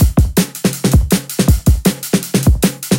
fast-break-beat-2 Light
The original sample was recorded YSJ_Sounds:
I bit his efforts: expander/compression/saturation.
break, Drum-n-Bass, drum, breakbeat, 160-bpm, drums, beat, Acoustic